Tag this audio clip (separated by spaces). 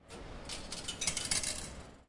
campus-upf centre change coins comercial glories machine mall park parking payment shopping UPF-CS13